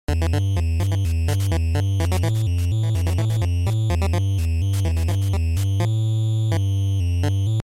8-Bit-Get special Item.
This is my 8-Bit-Get special Item Sound. You can use it, when your Player
gets a special/rare Item.
This Sound is made with FamiTracker.
64, 8-bit, Pixel, c64, get, item, retro